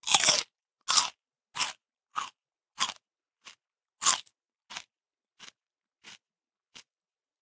CeleryBite3 and Chew
Clean recording of a bite into celery followed by noisy chewing. The quick crunches sound like they could be almost anything fibrous or fragile being eaten. Condenser mic / Sytek pre-amp / Gadget Labs Wav824 interface.
crunch, clean, vegetable, eating, dry